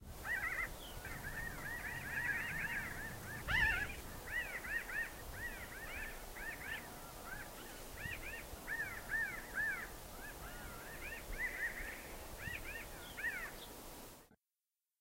Flock of pinyon jays around a bird feeder near Bozeman, MT (Feb 2010). Wind in background. Recorded with a shotgun mic and a Zoom H4n.
field-recording, bird, pinyon-jay, jay